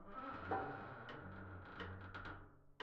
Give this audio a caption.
lift 8 - creak Low
Some lift noises I gathered whilst doing foley for a project